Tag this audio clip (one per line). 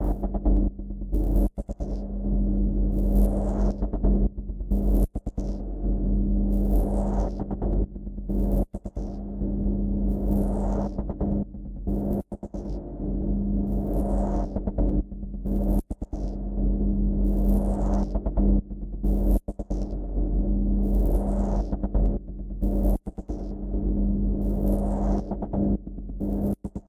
noise
experimental
processed
pattern